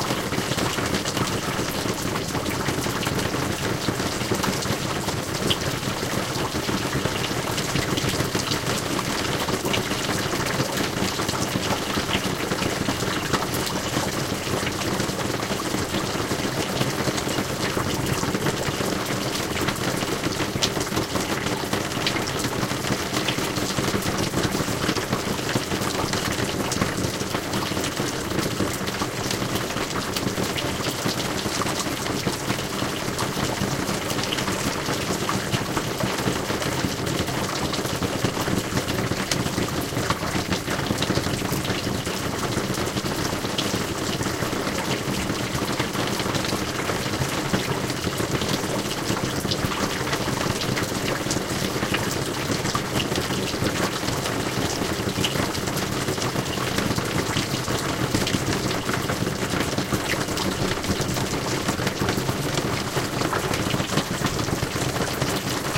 dribbling rain (snow melt from roof) [1]
Actually is not rain. It is snow melting down from a roof, falling into puddles and onto a corrugated roof. I placed the microphone (Zoom H2) on different spots in 4channel sorround mode. I just normalized them and mixed them into stereo. Thats it.
In this case the mic is placed on the ground in the middle of the puddles and the roof.
You can easily loop the sound without a notable cut in the mix.
cheers, pillo
raining, drizzle, drip, drops, drizzl, rainfall, weather, water